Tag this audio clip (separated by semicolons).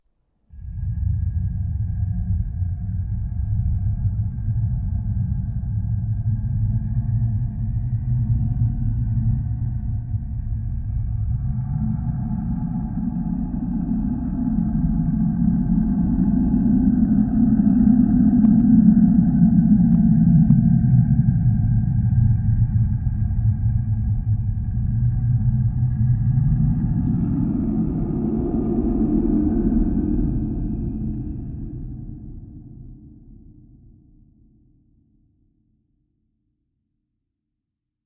scary; spooky; loop; anxious; background; rumble; atmosphere; terrifying; sinister; horror; dark; cave; deep; creepy; ambient; terror; haunted; ambience; ghost; suspense